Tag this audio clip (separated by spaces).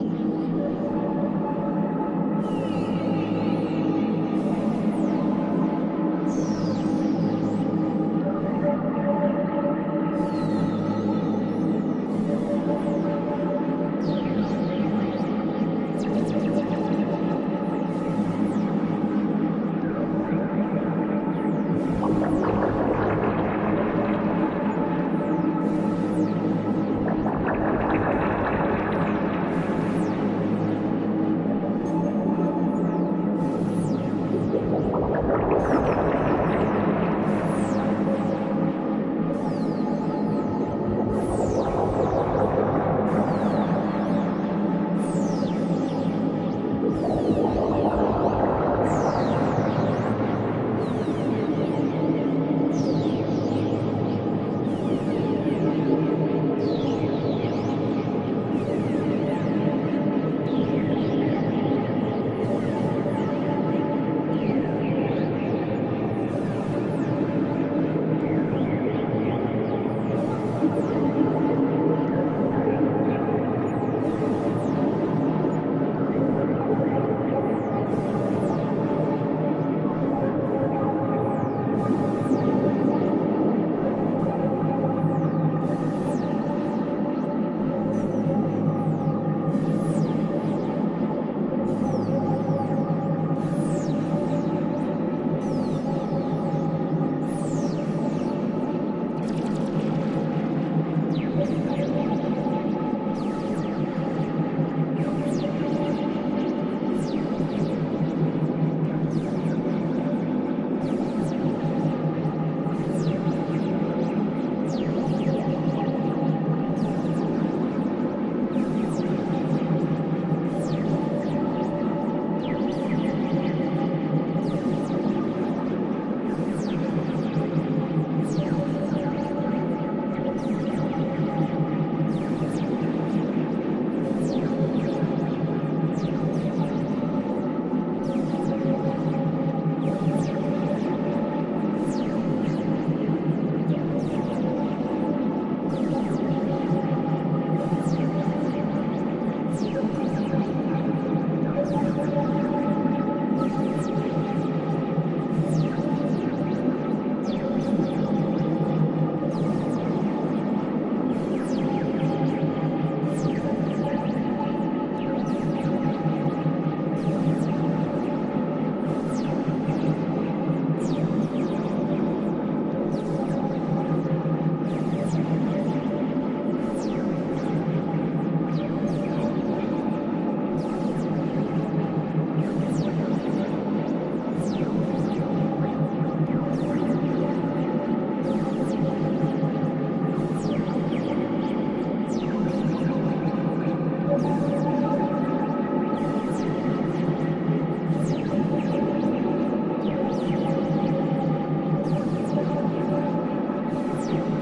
ambiance ambient analog atmosphere background buchla com doepfer dreadbox drone dronemusic electricity electro electronic eurorackmodular experimental glitch minimal noise self-modulation sound-design synth synthesis synthesizer